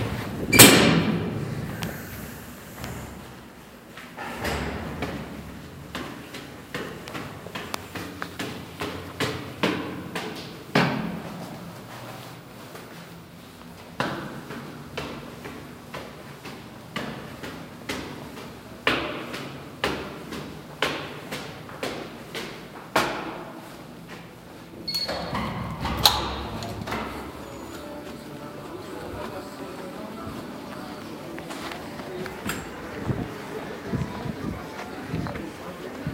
Walking up inside an office stairway
Door to stairwell opens and closes and someone walks up two sets of stairs, the first one slighty faster than the second. Then another door is opened and the walker enters an office where music is playing very softly and there's a bit of an office din. The office portion can also sound like entering a hotel lobby or outer waiting area of a symphony orchestra concert.
The stairwell is concrete and metal. It's very echo-y.
Recorded on a Samsung Galaxy S3